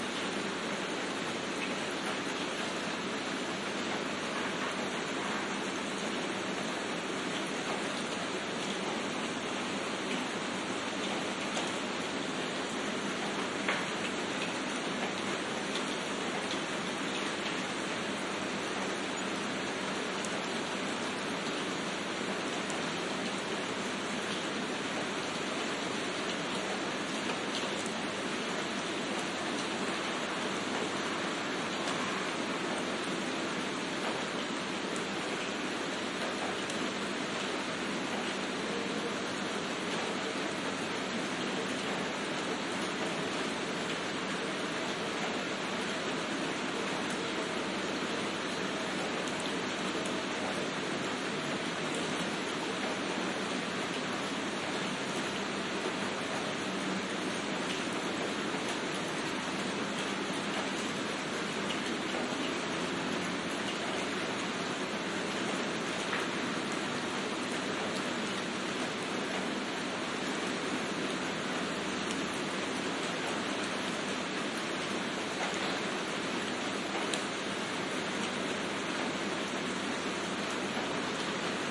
Binaural Light-Harder Rain Skopje Balcony Noise
A bit stronger and longer rain sample rec with Sony M10 and Binaural Earbuds.
Rain, Ambient